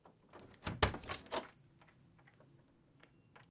Door Open Far 3
A door effect recorded for Intermediate Sound.
Recording Credit (Last Name): Bell
Open, H4N, Door